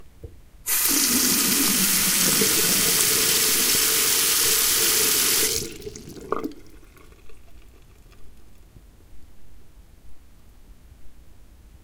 Water going down the drain.
Recorded with Zoom H2. Edited with Audacity.
bathroom, flow, liquid, flowing, drain, water, tube